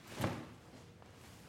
object falls (2)
Heavy bundle (linen) dropped near microphone on concrete floor. With imagination, it could sound like a body falling to the ground.
Recorded with AKG condenser microphone M-Audio Delta AP
thud, crash, foley, soundeffect, linen